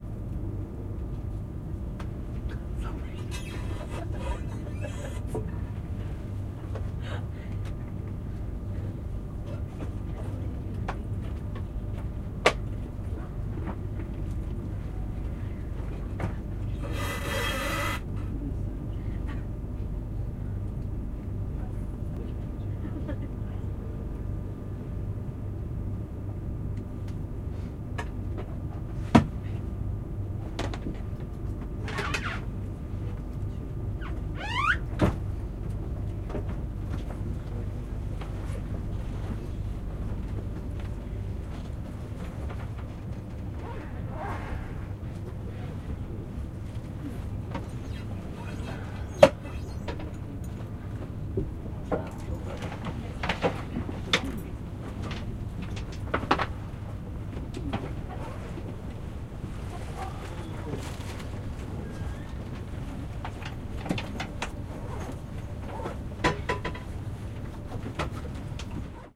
Preparing to leave train f
The sounds inside a train compartment - people moving and speaking, preparing to leave the train, the creaking of the train, a deep hum or rumble from the movement, and the engine. Recorded on the Doncaster to London Kings Cross 07.55 train.